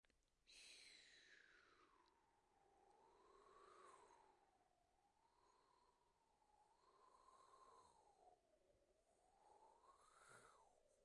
Wind with the mouth
storm, creepy, Wind, horror, scary, haunted, thriller, nightmare, garden, autumn, drama, terrifying, spooky, windy